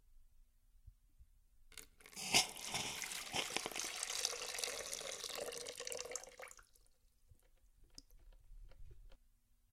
Coffee Maker - Water Pour, Steam Hiss
The sound of water being poured into a coffee maker with a hiss of steam.
coffee, maker, pour, steam